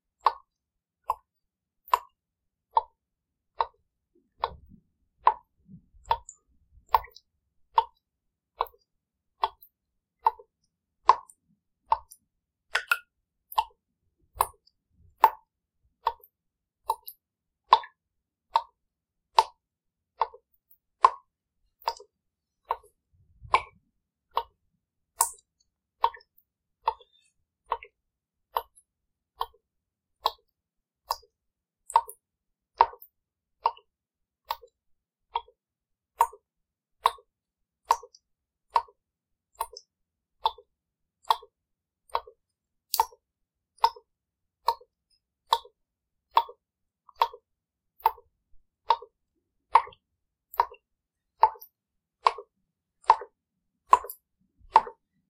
Water Drip - 2
liquid, splash, tap, water